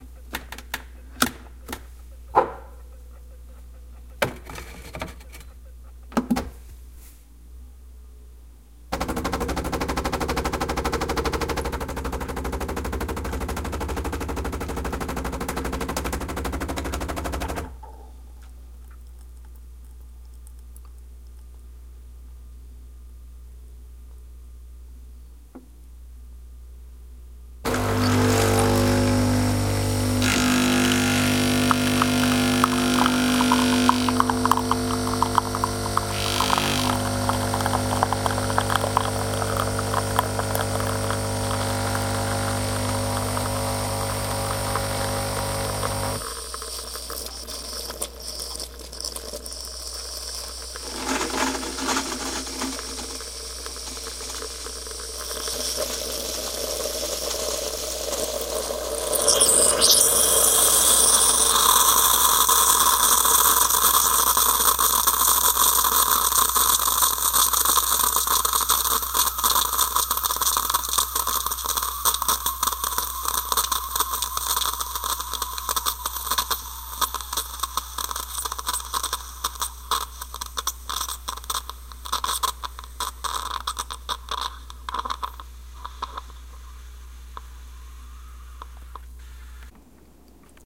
Recorded by me on a Tascam DR-05.
appliances, bosch, brew, brewing, cafe, coffee, espresso, machine, maker